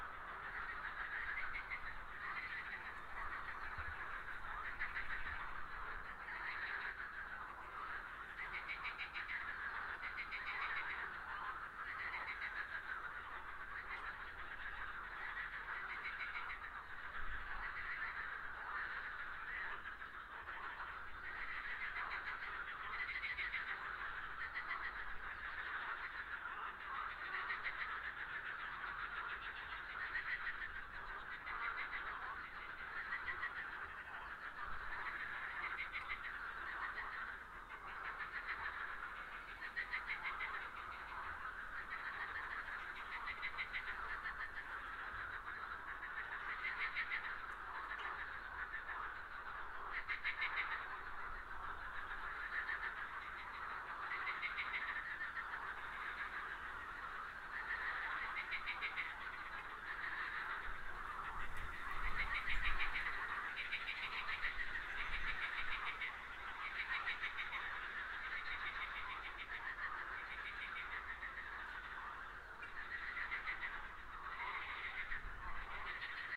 This raw sound, Recorded by zoom h4n in Russia, Ivanteevka, near Ucha river 2017/05/21